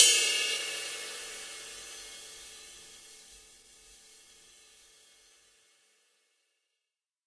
Zildjian Transitional Stamp Sizzle Ride Cymbal Bell Hit longer fade
Sampled off of a rare transitional stamp Zildjian sizzle cymbal with 6 rivets.
ludwig, pearl, percussion, drum, cymbal, yamaha, paiste, sabian, zildjian